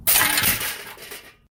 bicycle crash 2
Recorded for a bicycle crash scene. Made by dropping various pieces of metal on asphalt and combining the sounds. Full length recording available in same pack - named "Bike Crash MEDLEY"
impact, clang, hit, fall, drop, metal, bike, percussion, metallic, machinery